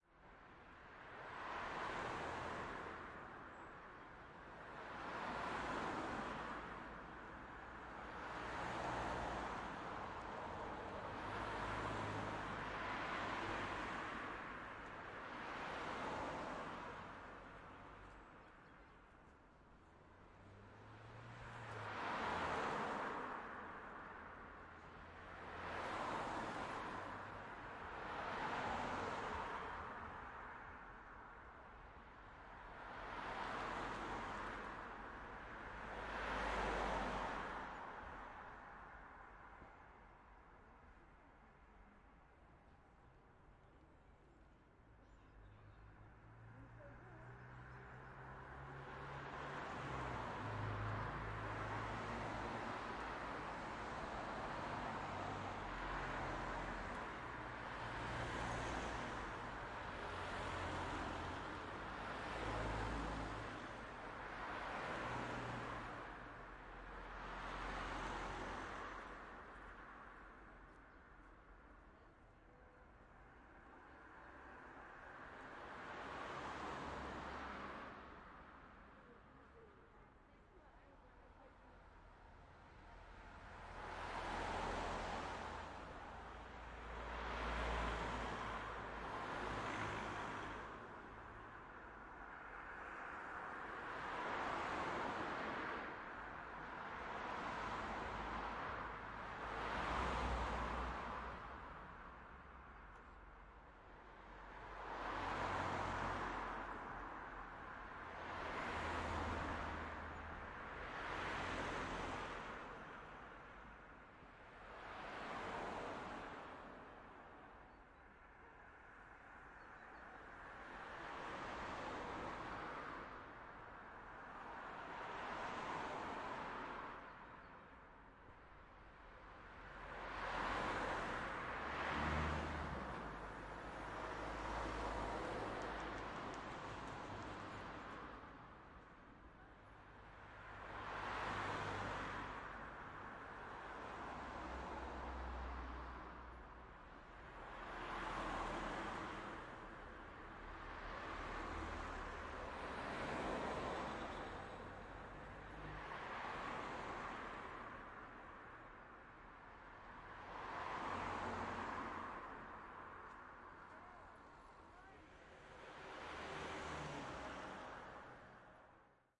Cars Pass By Medium Speed Busy Street Berlin Light Chatter Birds